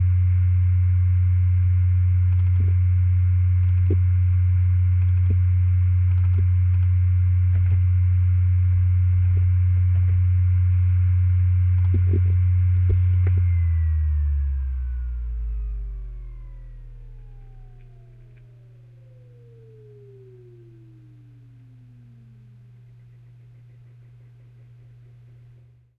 Contact mic recording
bass, contact, field, hoover, mic, recording